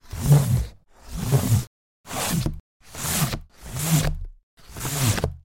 Creating wooshes from rubbing a carboard box with a woodn stick. Normalised.
wood, movement, stinger, woosh, cardboard